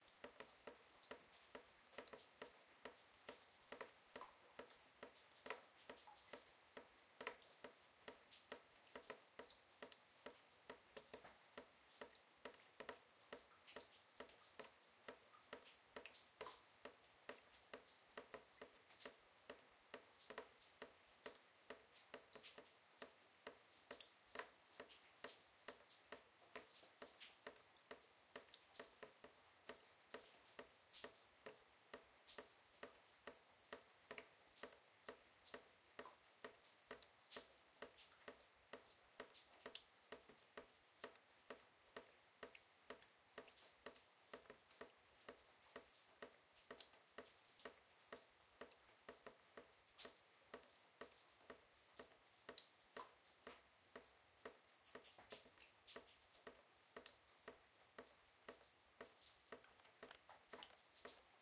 Rain leak falls from the roof into a plastic bucket, making a fast rythm
bucket, leak, rain, plastic, rythm